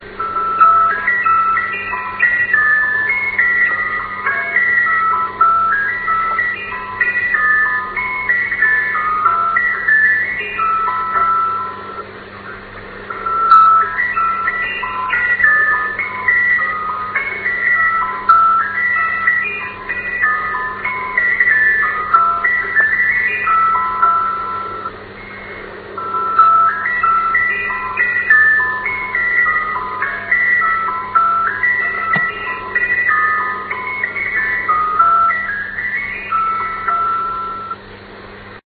creepy swedmusic
This is a creepy recording of a "spy station" or numbers station on a shortwave radio band. These stations existed heavily in the cold war to relay info to spys. Many still exist today on shortwave.